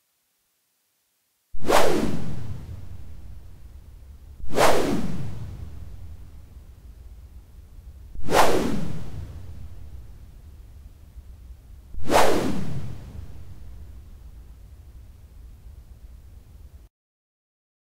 f Synth Whoosh 23
whooshes whoosh swoosh Gust
Gust, swoosh, whoosh, whooshes